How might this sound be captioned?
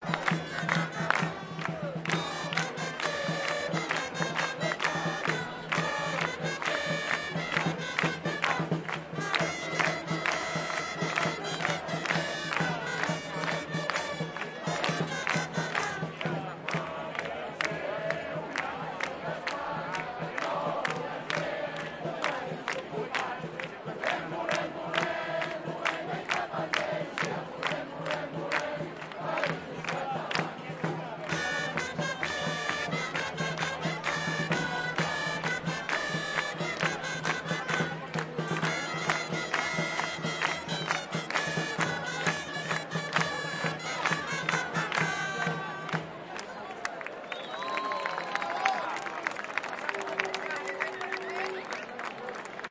gralles no volem ser una regio d espanya
una, ser, espanya, regio, no, gralles, d, volem